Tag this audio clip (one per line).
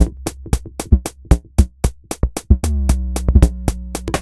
electro
bass
114-bpm
drumloop